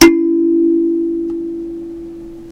Kalimba note4
A single note from a thumb piano with a large wooden resonator.
kalimba, metallic, thumb-piano